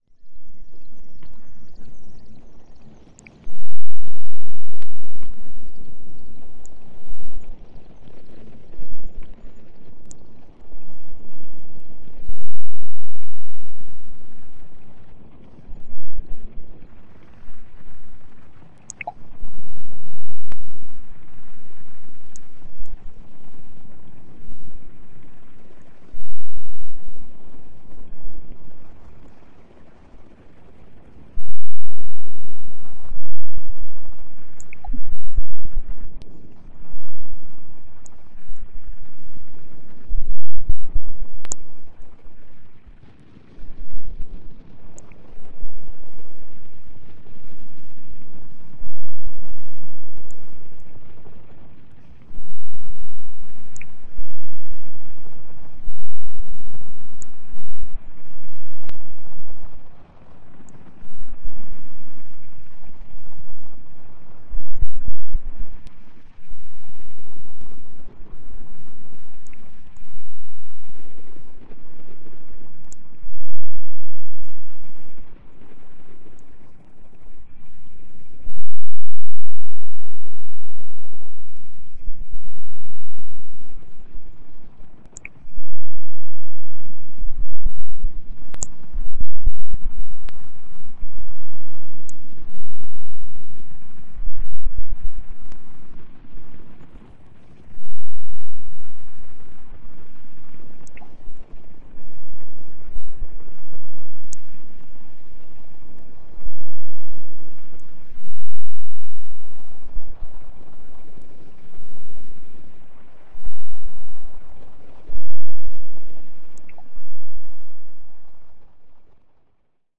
1.This sample is part of the "Noise Garden" sample pack. 2 minutes of pure ambient droning noisescape. Droplets.